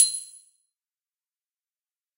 Ceramic Bell 12
bell, ceramic, chime, drum, groovy, metal, percussion, percussive, rhythm